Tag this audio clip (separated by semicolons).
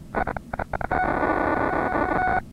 beep; click; digital; glitch; noise; stretch